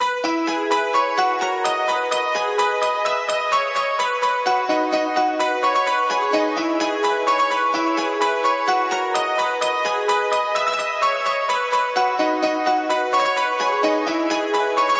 Bright Piano 128bpm (ARP)
This sound was created with layering and frequency processing.
You can use this music in your videos.
BPM 128
Key A maj